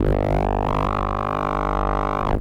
sherman cable84
I did some jamming with my Sherman Filterbank 2 an a loose cable, witch i touched. It gave a very special bass sound, sometimes sweeps, percussive and very strange plops an plucks...
filter, sherman, electro, noise, filterbank, dc, ac, analouge, cable, touch, phat, fat, analog, current